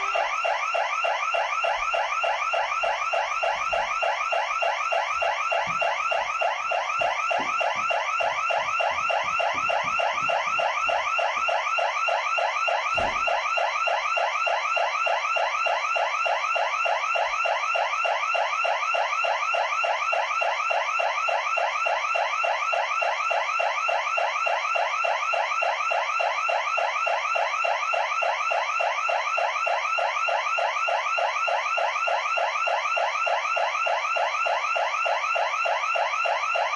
alarm house security nearby1
alarm, house, security